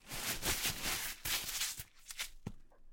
Tissue paper being scrunched up into a ball.